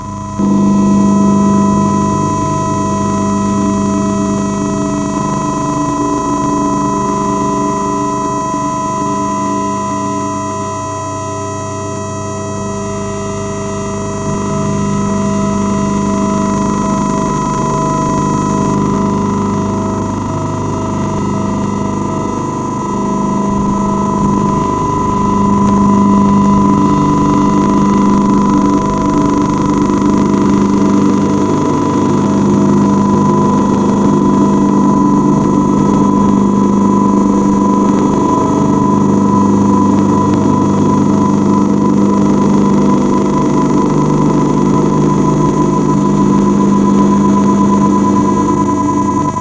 Default Project
Our galaxy, as well as others, are crossed by radiowaves,some of which come from so far away places that the signal is nearly distinguished, but many radiowaves have not been attenuated much, so you need a rather simple receiver for catching the signal, THEN it ain't that easy any more. Out of the mess of billions signal. you may have the wish to sort out all signals from our qwn planet. In principle all jobs start with starting your local oscillator, mix incoming signals with the local osc frequency, which results in lots of intermediate frequencies, which are easier to handle than the incoming radiowaves. In the example you may have 1,000 IM signals which are all so near each other in frequency, that you cant't separate them. It's a cacafony, a meningless noise. But there ae Tools for solv all problems. You may switch on true Xtal filters that are so stable in their resonance frequency , that you can begin to analyze single frquencies for possible messages.
filter, frequncy, Intergalactic, Intermediate, local, noise, oscillator, radiowaves, SPACE